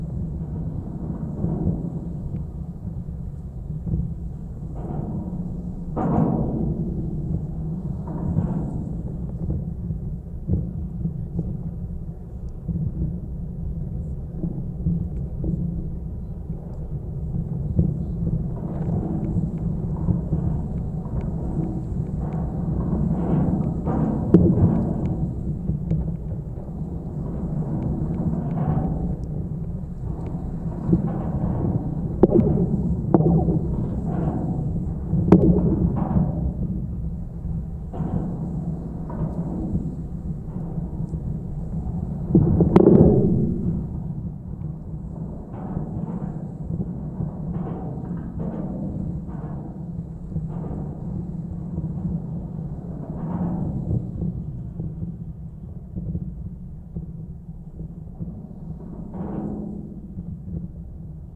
GGB A0211 suspender SE21SW
Contact mic recording of the Golden Gate Bridge in San Francisco, CA, USA at the southeast approach, suspender #21. Recorded October 18, 2009 using a Sony PCM-D50 recorder with Schertler DYN-E-SET wired mic.